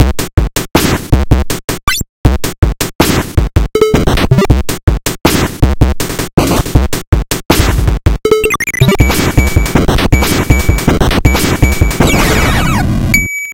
NES 160 bpm 6:8
This is a remix of samples from HardPCM's "Chip" pack. It was sequenced using Digital Performer and the iDrum plugin instrument. In 6/8 time.
160-bpm, 6, 8-time, beats, loop, nes, remix